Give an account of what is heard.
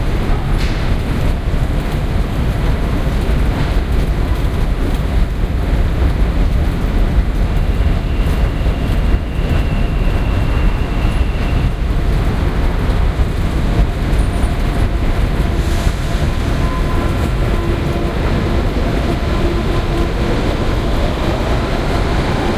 Chancery Lane - Shortest escalator on network (up)